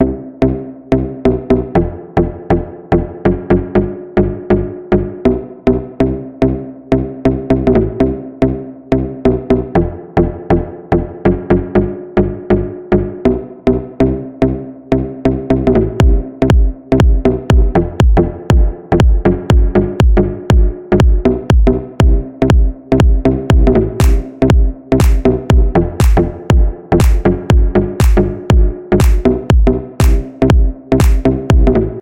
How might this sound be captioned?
Deep house loop
This sound was created using the "SURGE" synthesizer.
BPM 120
KEY E minor
EDM, Tropical, Town, Ghost, Tribal, Surge, Minimal, POP, Future